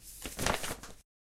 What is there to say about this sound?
Foley recording of a envelope. Works great to use for picking up a note or something like that.
read, book, reading, newspaper, paper, envelope, magazine, note, scribble, page, crackle, foley, horror, journal